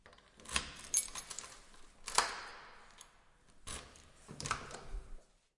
Opening door in stairwell
Opening a door in a stairwell. Recorded with a Zoom H5 with a XYH-5 stereo mic.
door, stairwell